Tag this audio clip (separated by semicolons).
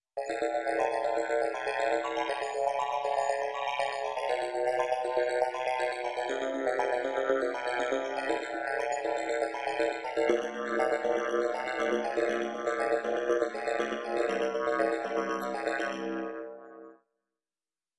120bpm; arpeggio; bell; melodic; sequence